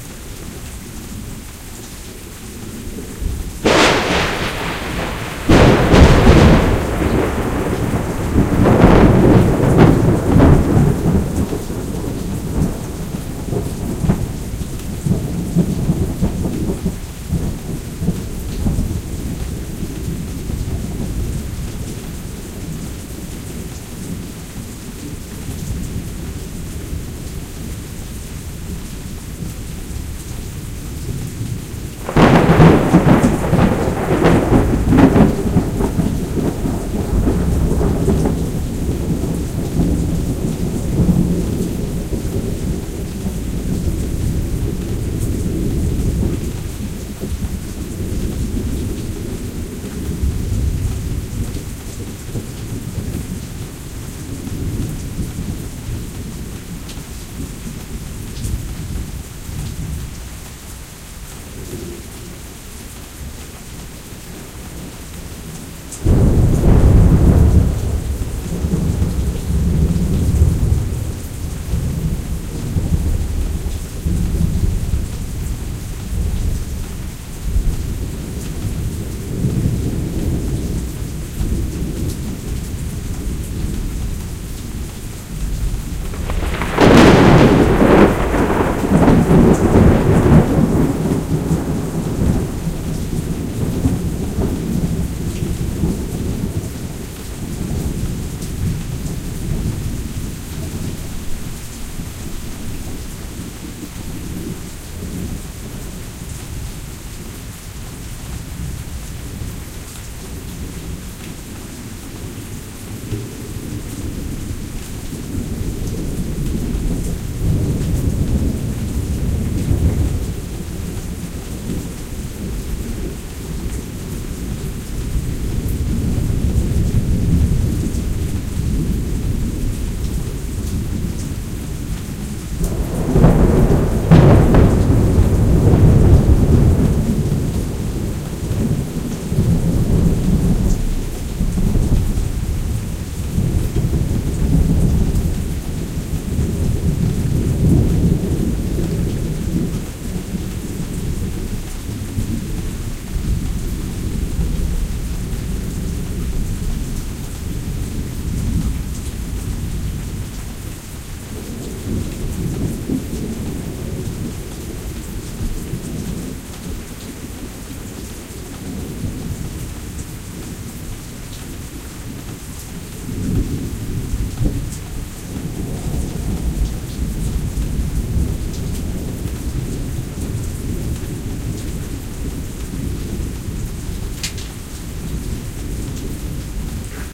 Heavy thunderstorm recorded on my front porch using YETI usb microphone, Windows 8.1 and Adobe Audition,16 bit, 44,000kz